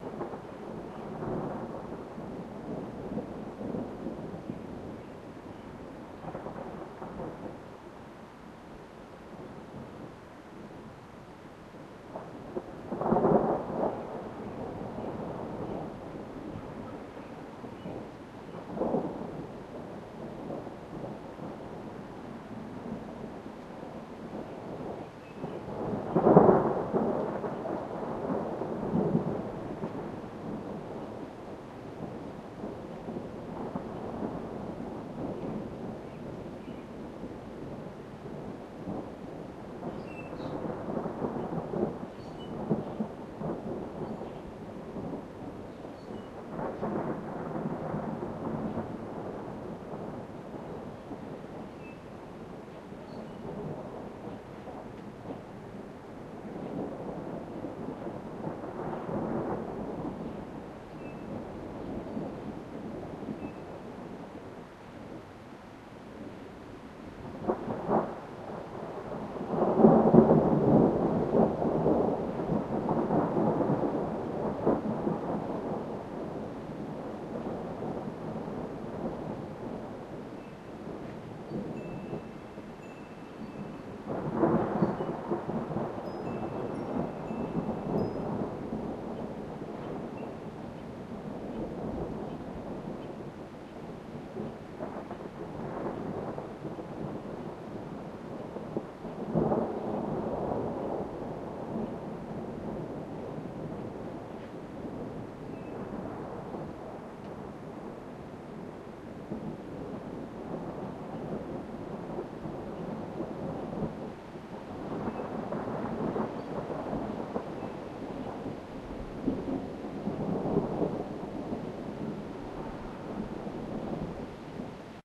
distant rumbling
distant thunder rumble in colorado